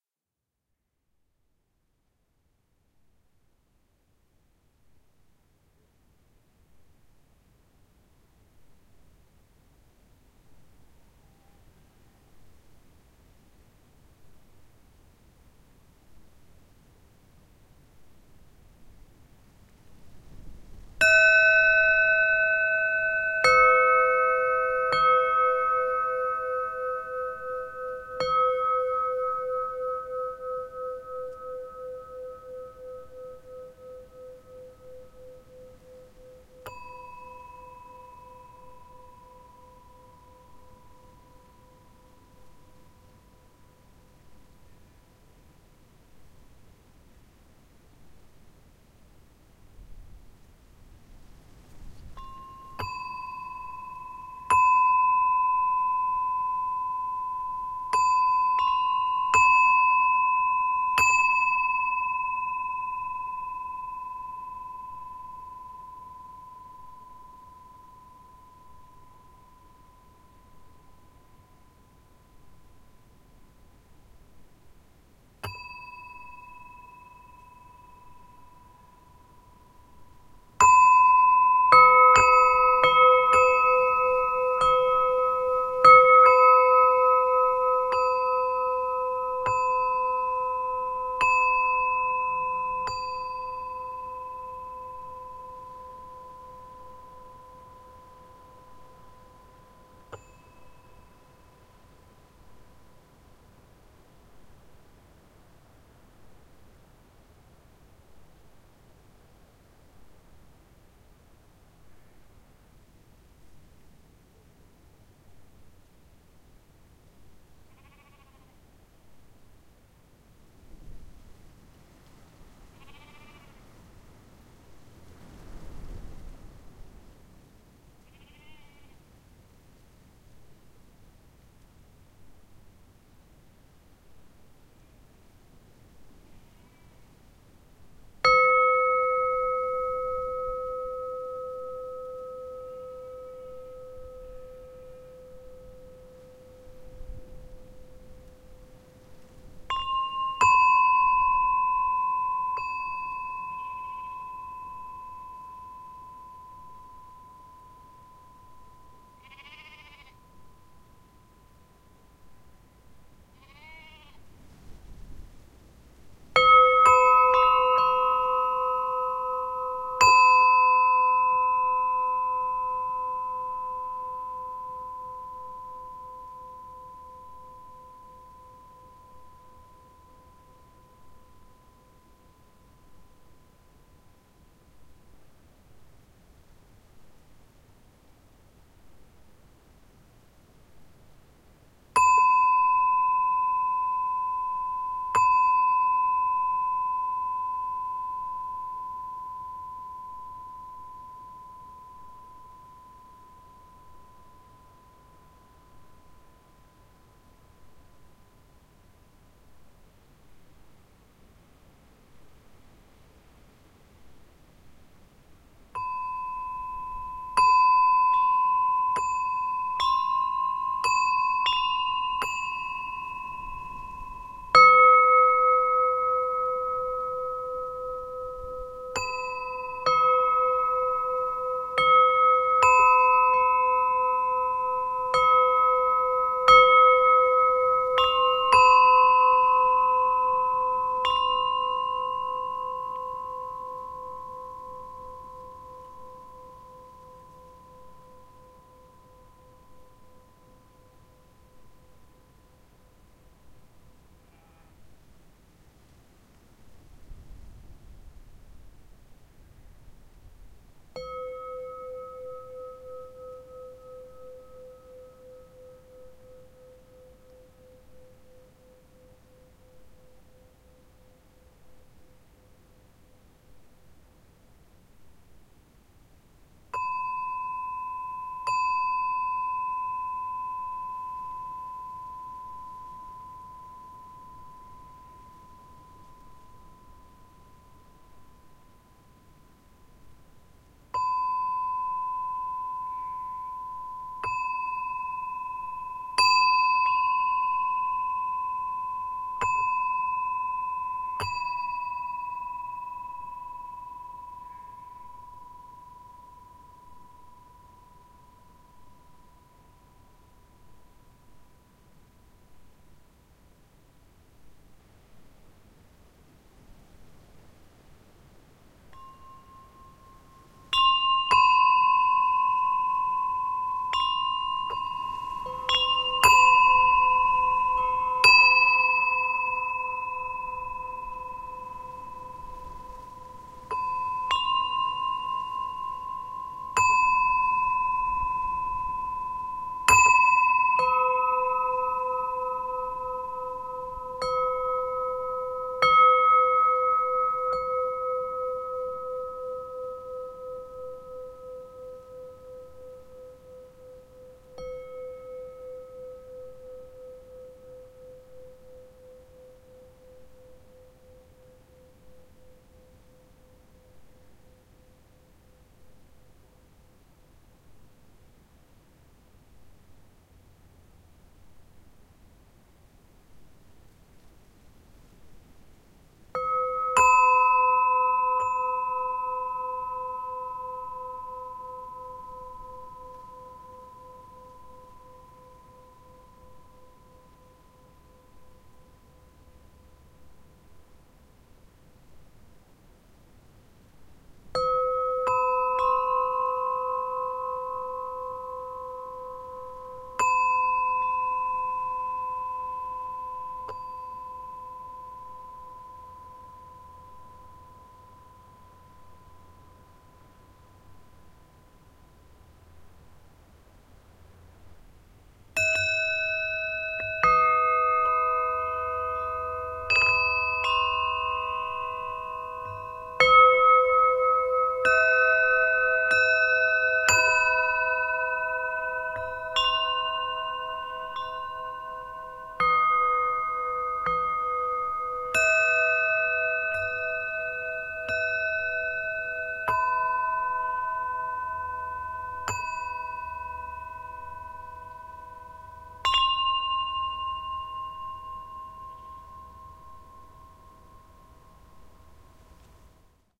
Bells Windchime
Windchime made with four different toned stationary bells struck with a free swinging clapper that is designed to spin as the wind moves it. This results in a chaotic/random noise. Recorded about a metre from the chime with a Zoom H2 on-board front mics & dead kitten. You can also hear the moderate wind rustling the leaves of a blueberry bush above the mics as well as some sheep in a nearby field.
All these bells have been recorded individually and are available in the same pack as this one.